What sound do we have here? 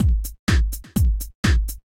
Moving Trance Beat
This is a beat loop witch can be used in trance and or House productions.